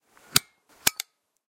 Turning light on and off
Turning the light on and off by an old light switch
switching, stereo, switch, off, light, old